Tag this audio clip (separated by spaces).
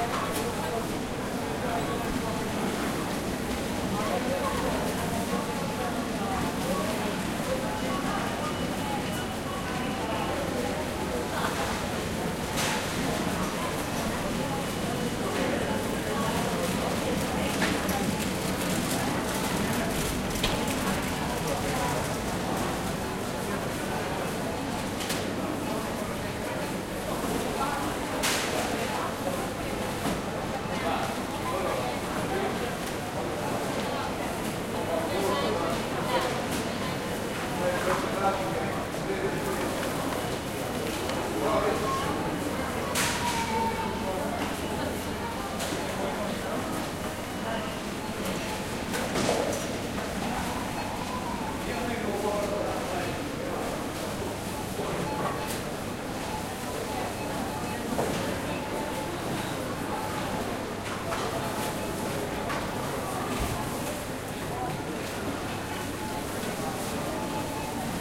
ambiance ambience ambient atmo atmos atmosphere buying selling supermarket